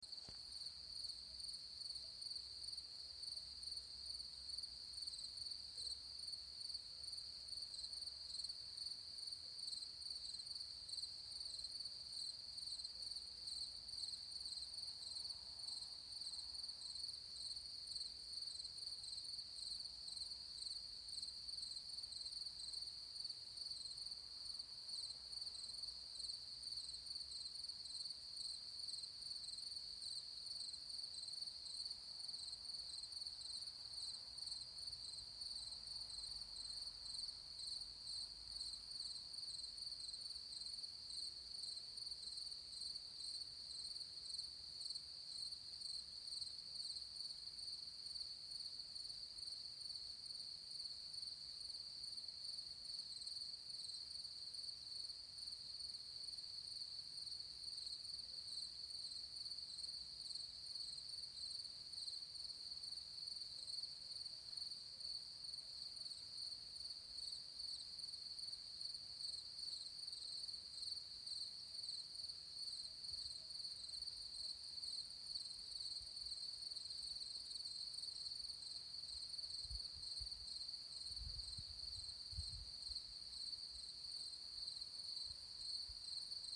Crickets in a field, summer night. Recorded with H2N, no editing.